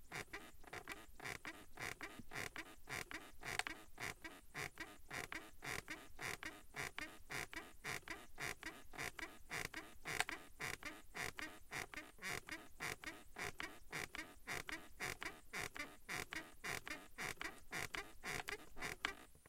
bedsprings#own#2
Medium speed regular bed squeaking
squeaky, squeak, creaky, creak, bedsprings